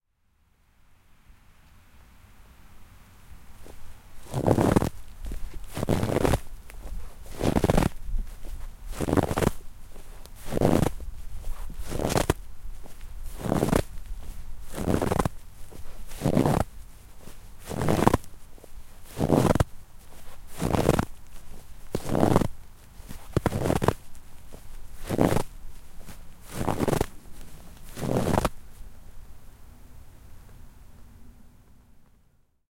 Tried to keep the steps separate in case any one needed to edit them...!